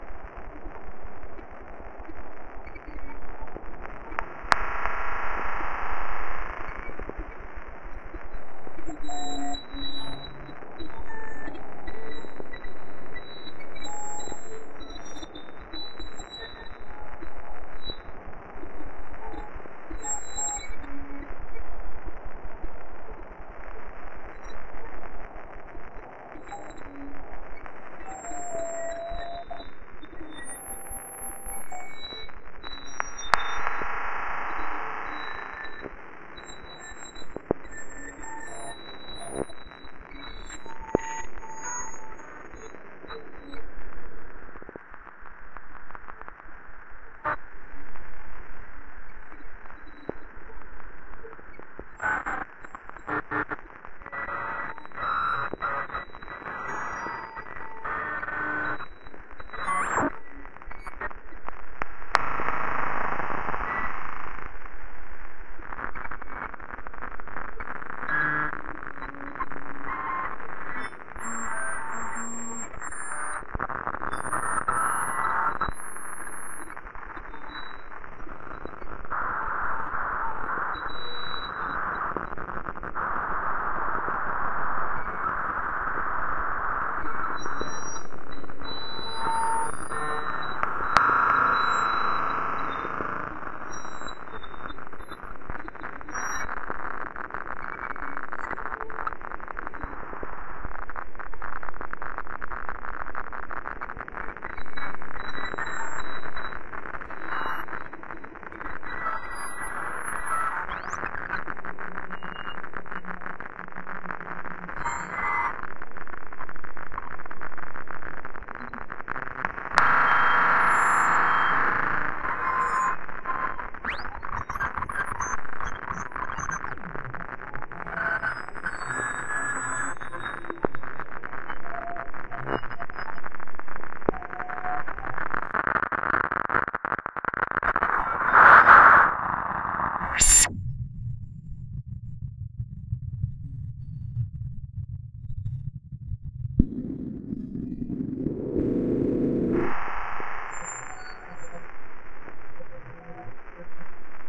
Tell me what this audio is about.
A couple of variations that began with simply feeding back the various modules in Gleetchlab upon themselves. There is no external output but there is some Reaktor effects for good measure.
digital, gleetchlab, glitch, noise, reaktor, space